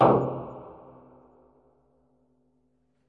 Tank of fuel oil, recorded in a castle basement in south of France by a PCM D100 Sony